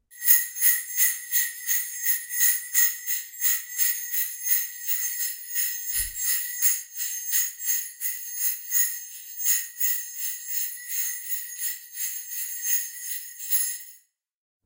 Music Jingle Bells Jingling
Jingle bell sound that I made with....jingle bells...The 101 Sound FX Collection.
horror, piano, music, bells, tune, jingle